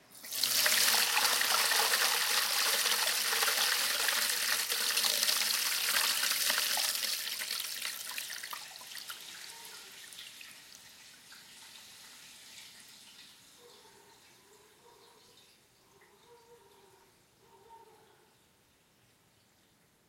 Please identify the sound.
Water Pipes 03
flow, liquid, pipes, stream, water